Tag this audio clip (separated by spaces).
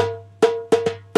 bass percussion